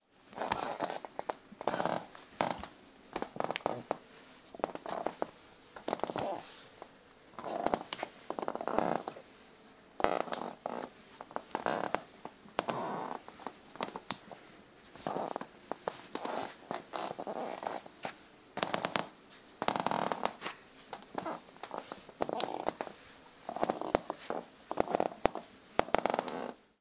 creak on the floor